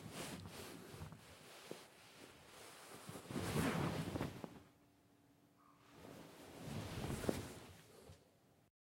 couch quick rise up 1 bip
Lying down, rubbing pillow, sitting up quickly, then dropping back down
couch, up